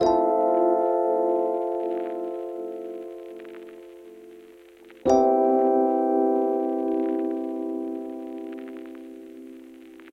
Simple Lofi Vinyl E-Piano Loop 95 BPM
melody, pack